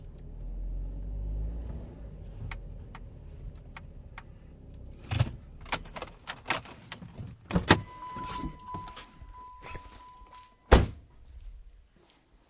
Getting Out of Car
This includes the car running, shutting it off, opening the door, getting out, then taking a few steps.